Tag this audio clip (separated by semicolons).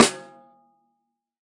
1-shot; drum; multisample; snare; velocity